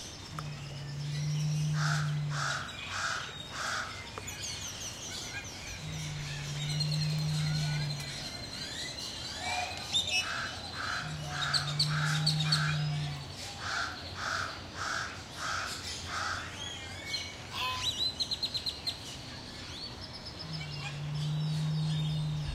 saz crowned pigeon
Low booming calls from a Southern Crowned Pigeon, with loud calls from Pied Crows in the background, along with grackles, cranes etc.
birds, tropical, rainforest, pigeon